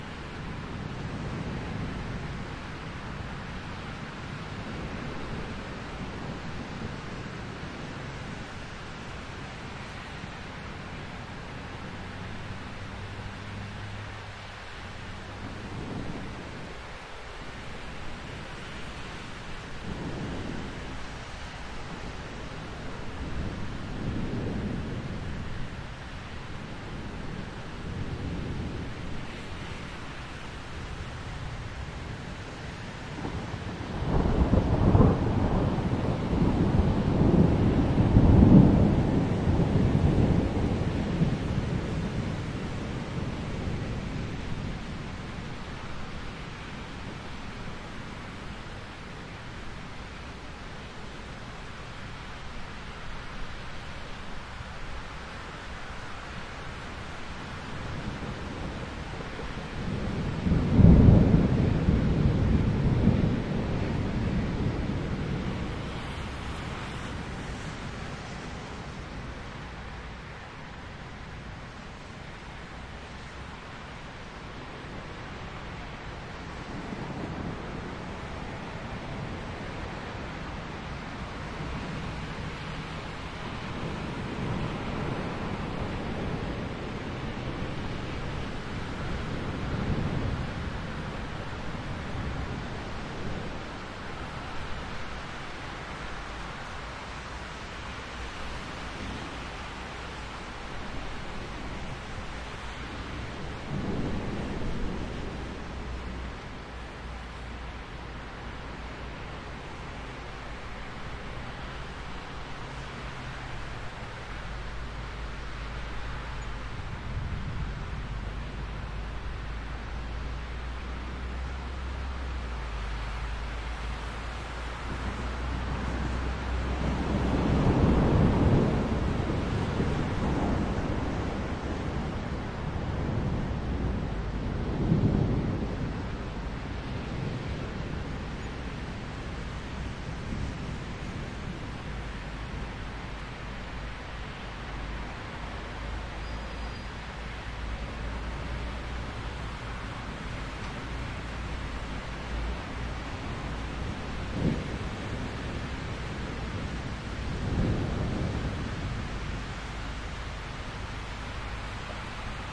Heavy rain and thunders recorded on 9th of May from 11th floor balcony, with road traffic and cars passing by below